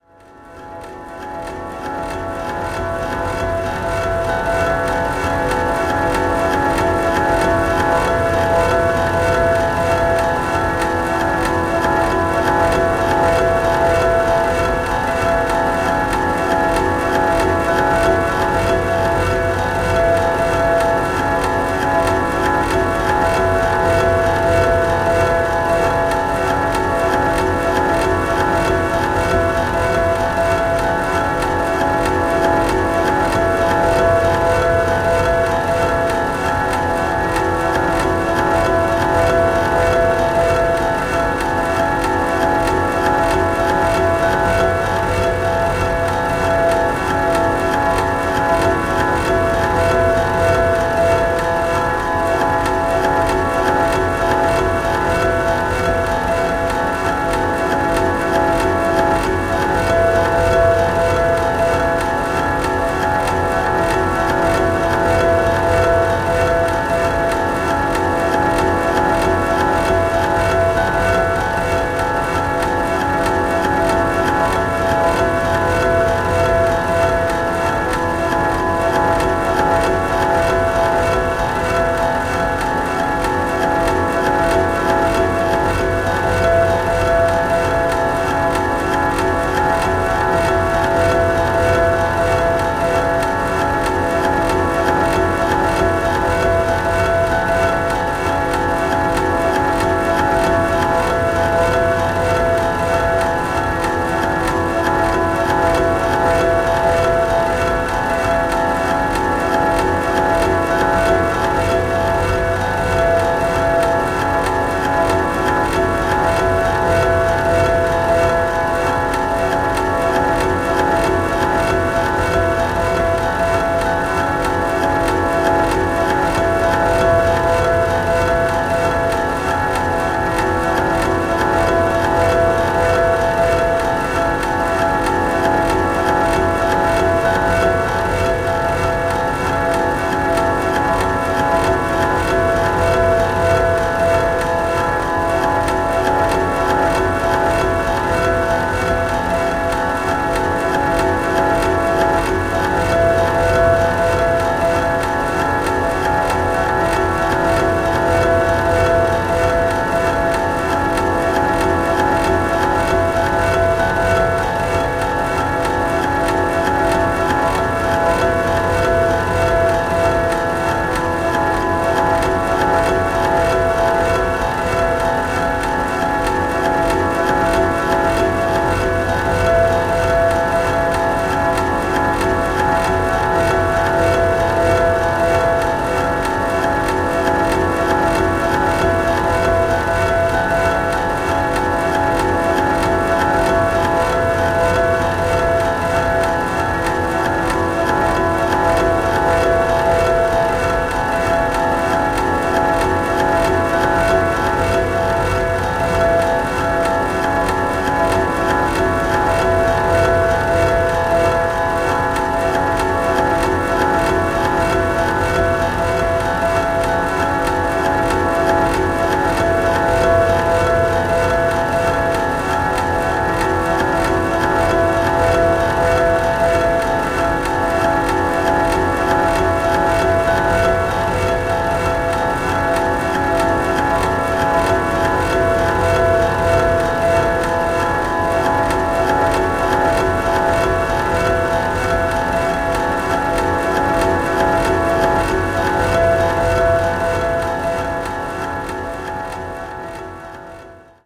Granular Guitar
Granular processed guitar noise.
Noise, Experimental, Ambient